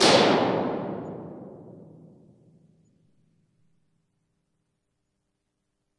Impulse response of stairwell in Alanvale TAFE Tasmania, Australia.
Impulse source: balloon pop
Recorder: Zoom H2
Intended for use with convolution reverb software.